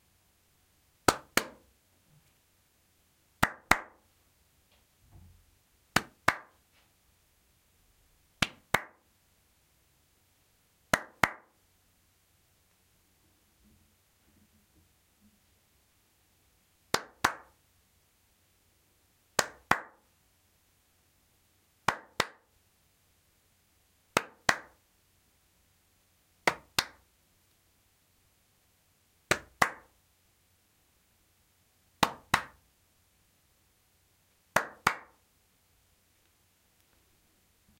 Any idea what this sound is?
handclaps sounds. Raw sound so you can tweak as much as you like. Recorded with Rode videomicNTG.
handclaps, hands, human-sounds